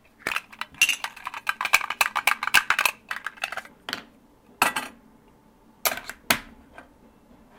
opening mono
i opened a tin
open, sound, tin